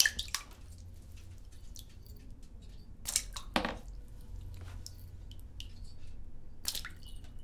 Small Splah
liquid, splash, water